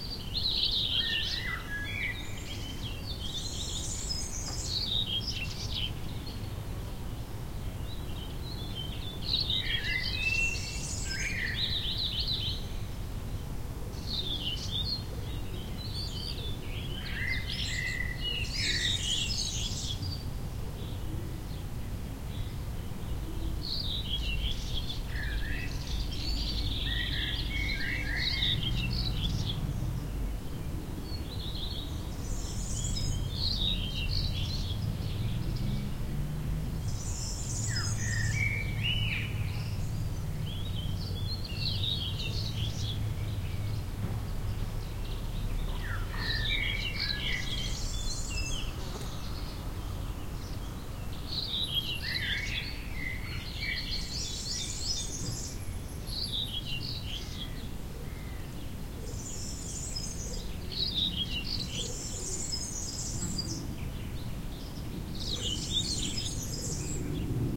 140809 Neuenburg Courtyd Morning R
Early morning in the courtyard of Neuenburg Castle, located above the German town of Freyburg on Unstrut.
Birds are singing, some light traffic from the town can be heard in the distance.
These are the REAR channels of a 4ch surround recording.
Recording conducted with a Zoom H2, mic's set to 120° dispersion.
4ch, ambiance, ambience, ambient, architecture, atmo, atmosphere, background-sound, bird, birds, castle, early, Europe, field-recording, Freyburg, Germany, morning, nature, Neuenburg, surround, traffic